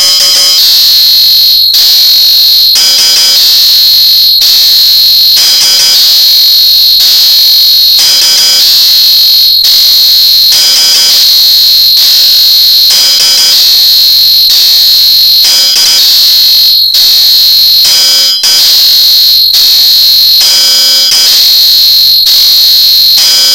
Dismusical membranic audionervonic nonpleasural psychotherapy
creepy, dissonance, earpain, psycho